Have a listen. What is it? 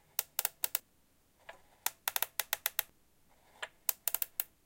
MECHLock clicky noise combination lock
Clicky noise from a combination lock. Recorded with ZOOM H6, XYH-6.
click-sound, clicky, combination-lock, field-recording, lock, safe